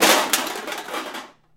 aluminum cans rattled in a metal pot
aluminum, cans